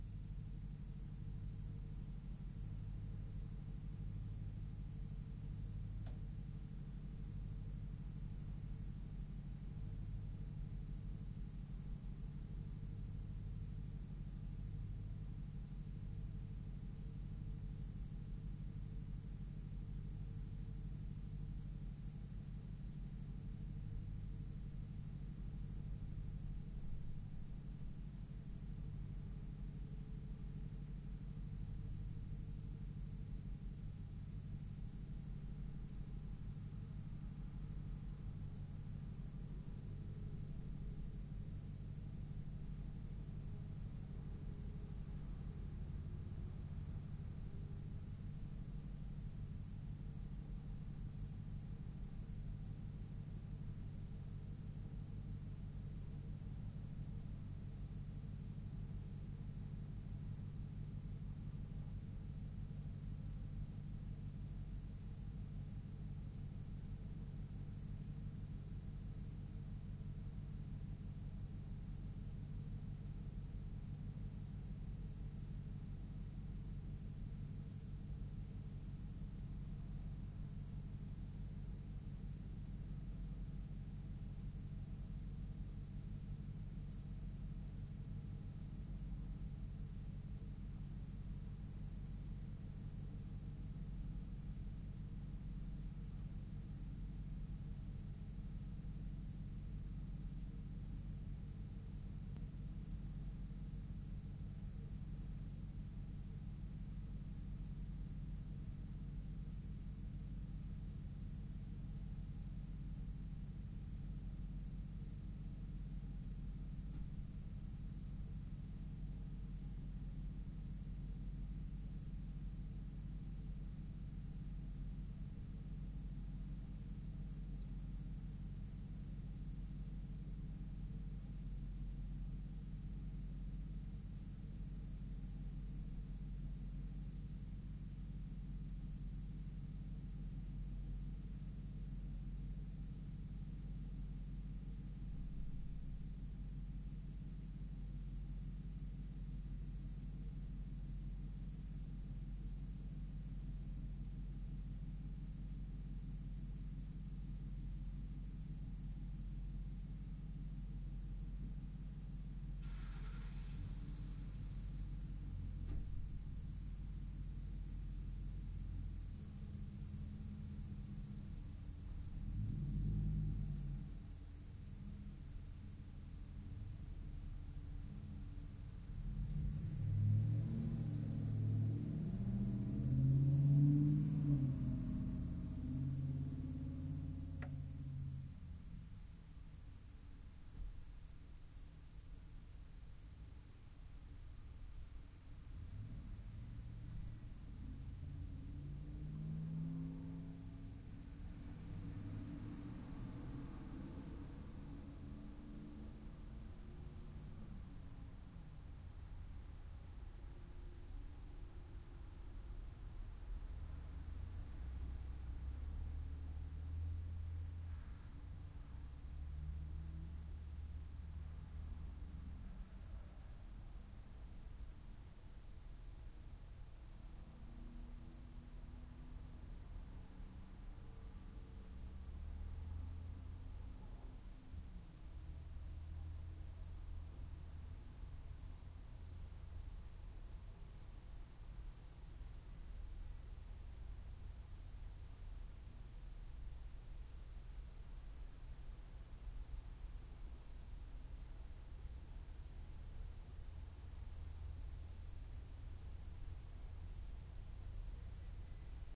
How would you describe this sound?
Truck stands ital for a long while. A Second vehicle starts it's engine then they both depart. Far off perspective.
Long description: A truck stands ital for most of the recording. You can hear as it warms up. then a second vehicle starts up. They both depart, with the truck dominating the second vehicle audibly